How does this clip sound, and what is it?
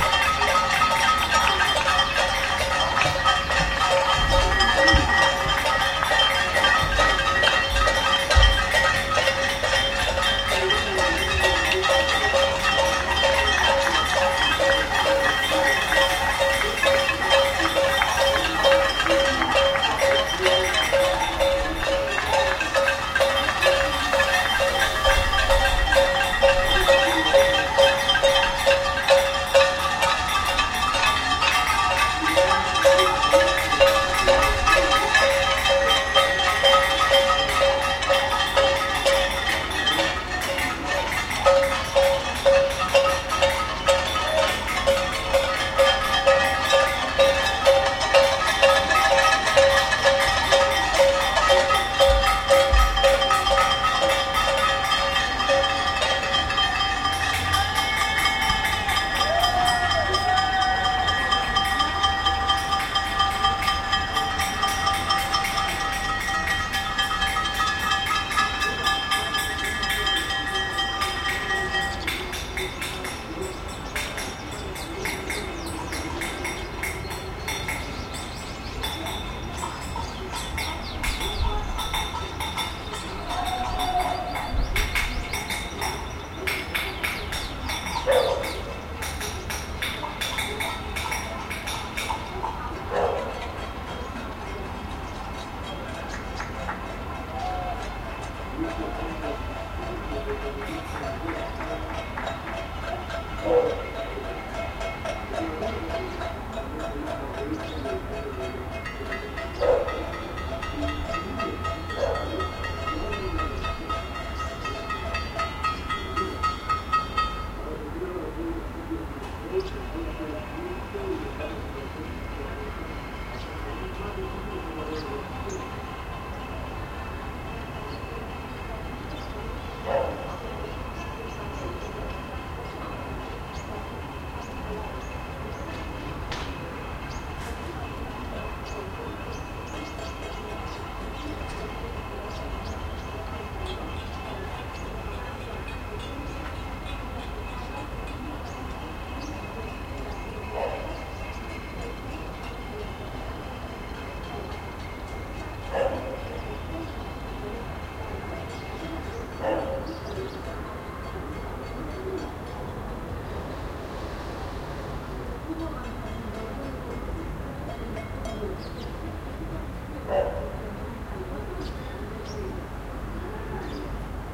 cacerolada barcelona
Cacerolada in Barcelona.